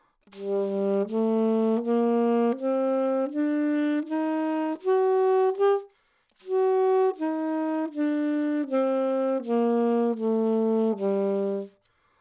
Sax Alto - G minor
Part of the Good-sounds dataset of monophonic instrumental sounds.
instrument::sax_alto
note::G
good-sounds-id::6809
mode::natural minor